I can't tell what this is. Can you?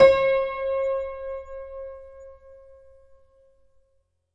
Simple detuned piano sound recorded with Tascam DP008.
Son de piano détuné capté au fantastique Tascam DP008.
detuned, piano, prepared